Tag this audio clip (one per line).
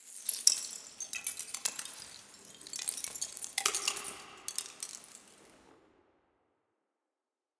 crackle
crunch
crush
drop
eggshell
ice
splinter